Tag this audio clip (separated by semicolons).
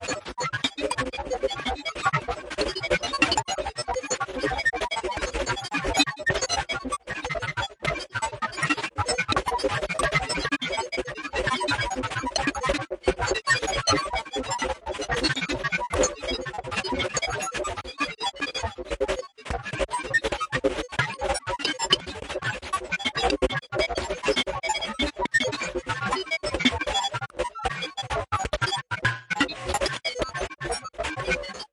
Robot; Noise; Commodore; Noisy; Glitch; Sequence; 60ties; 70ties; C64; Computer; 80ties; Synthesizer; PC; 8-Bit; Office; Arcade; Lo-Fi; FX